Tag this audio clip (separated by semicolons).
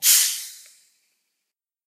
pop; bubbles; bottle; carbonated; beverage; cola; bubble; drink; plastic; fizz; hiss; coke; soda; cloa; fizzy